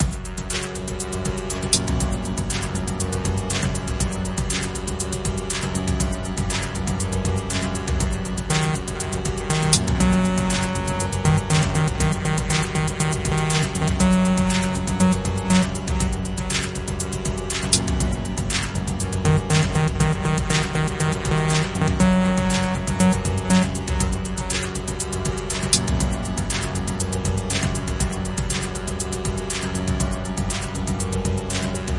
Loop Frosty v1-01
Loop, Experimental, Electronic